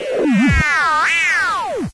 Sci fi systems malfunction sound
This sound can be used for a number of scenario's such as a robot malfunction.